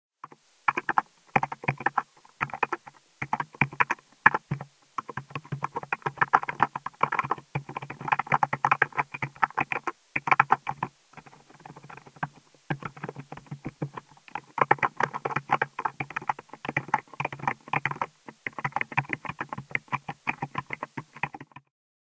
Lo-Fi Keyboard Typing
'Lo-Fi Computer Keyboard' recording.
Recorded using a home made contact microphone.
For information on making your own contact/piezo microphone please visit my 'How To Guide'
Contact-Microphone, Field-Recording, Keyboard, Sound-Effect, Typing